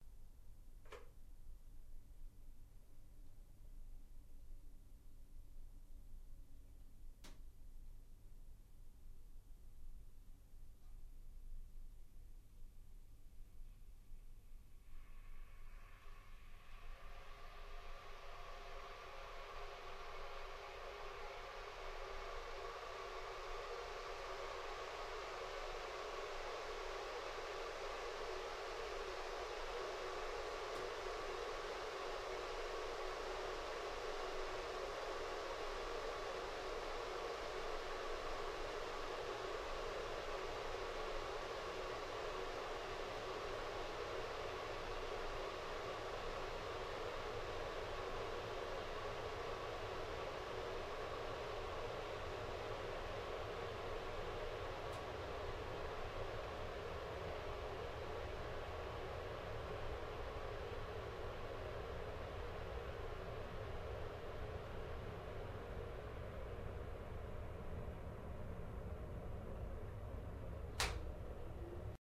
kettle G monaural kitchen
Recordings of kettles boiling in a simulated kitchen in the acoustics laboratories at the University of Salford. From turning kettle on to cut-off when kettle is boiled. The pack contains 10 different kettles.
acoustic-laboratory, high-quality, kettle